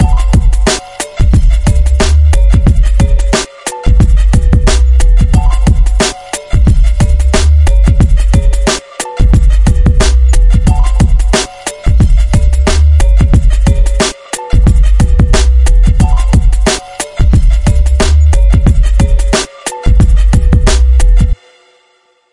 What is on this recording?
Hip hop beats synth
A drum loop with layered synths